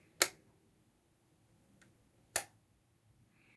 Interruptor SFX
Efecto de sonido generado al encender y apagar un interruptor de luz, capturado con un micrófono electret. Es ideal para trabajos de producción audiovisual en el acompañamiento de escenas visuales.
swich, sfx, sound, soundeffect, fx